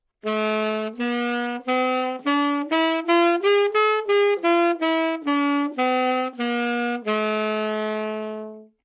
Sax Tenor - A minor - scale-bad-rithm-staccato-minor-harmonic
Part of the Good-sounds dataset of monophonic instrumental sounds.
instrument::sax_tenor
note::A
good-sounds-id::6229
mode::harmonic minor
Intentionally played as an example of scale-bad-rithm-staccato-minor-harmonic
good-sounds, scale